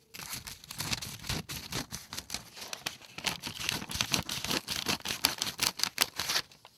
es-scissorscutting
cutting, paper, christmas, scissors